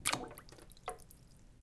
DSP Foley IceCubeInWater 01
So with that being said I'm going to be periodically adding sounds to my "Dream Sample Pack" so you can all hear the sounds I've been creating under my new nickname "Dream", thank you all for the downloads, its awesome to see how terrible my sound quality was and how much I've improved from that, enjoy these awesome synth sounds I've engineered, cheers. -Dream
Foley-Sounds, Ice, Liquid, Splash, Water, Zoom-H4